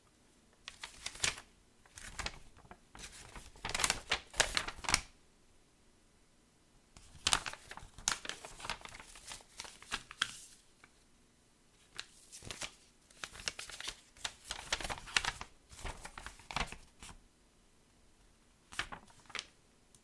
Papier plié déplié
Paper being moved twice
Recorded with a Tbone SC 440
letter, creased